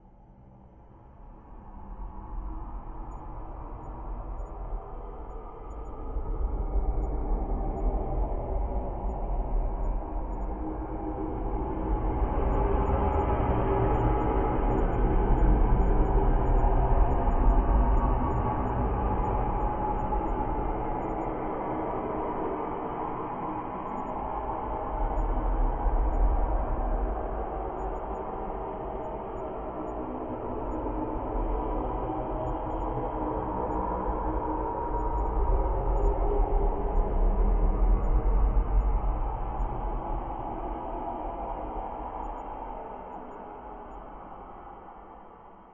Atmospheric sound for any horror movie or soundtrack.